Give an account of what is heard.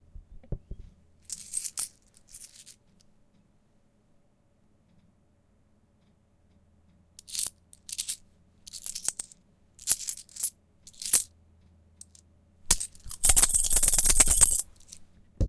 Handling Coins 3
money, coins, hands